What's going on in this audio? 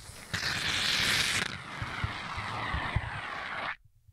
recording of shaving foam3. A sound that can let multiple associations arise when listening.